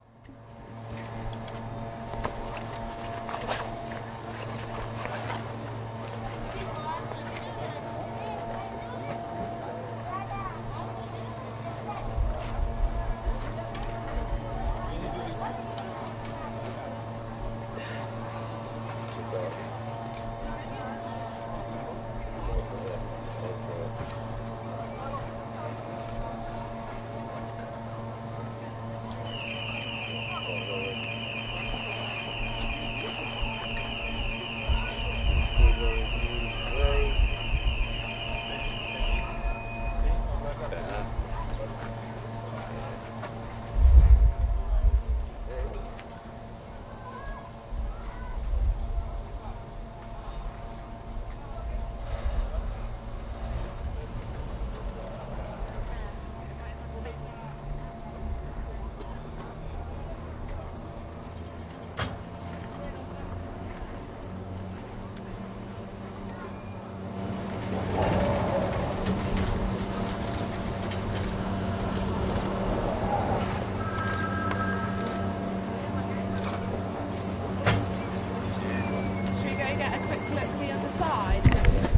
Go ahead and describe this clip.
Ski Lift
field-recording, nature-ambience, ambient